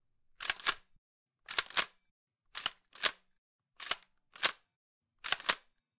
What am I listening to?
Gun Cocking Sound
A sound that I recorded from a friend's replica M14 SOCOM rifle. Sounds pretty real!
rifle, reload, pistol, metal, load, ammo, gun, pump, shot, shotgun, ammunition, cock